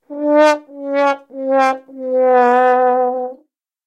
Sad Trombone
A dual mono recording of a descending trombone sequence. Made in response to a request by eagly1. Trombonist was my neighbour.